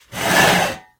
The sound of a metal folding chair being dragged across a concrete floor. It may make a good base or sweetener for a monster roar as well.